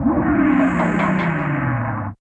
Sampled from my beloved Yamaha RM1x groovebox (that later got stolen during a break-in).